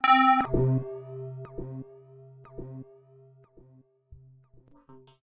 Good day. This atmosphere, texture sound make by Synth1. Hope - you enjoy/helpful
gamesound, gameaudio, fx